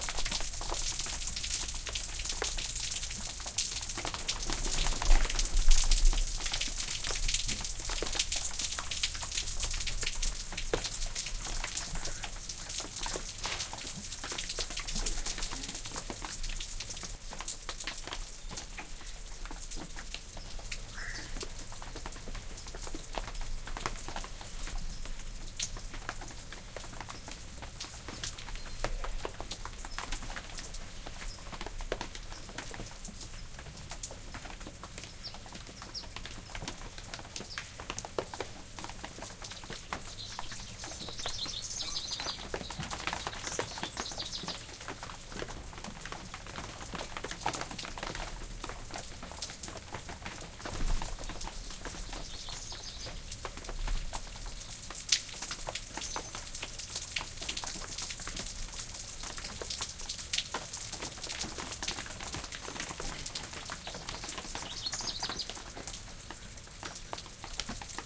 One minute of rain. In that time the intensity of the rain changed from moderate to sprinkle to moderate again. There are also some birds singing in the background. This is for an experiment showing the noise inherent in mics and preamps.
drips, gutter, rain, sprinkling